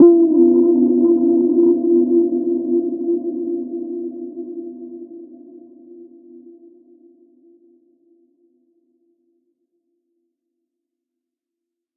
Blip Random: C2 note, random short blip sounds from Synplant. Sampled into Ableton as atonal as possible with a bit of effects, compression using PSP Compressor2 and PSP Warmer. Random seeds in Synplant, and very little other effects used. Crazy sounds is what I do.
110, acid, blip, bounce, bpm, club, dance, dark, effect, electro, electronic, glitch, glitch-hop, hardcore, house, lead, noise, porn-core, processed, random, rave, resonance, sci-fi, sound, synth, synthesizer, techno, trance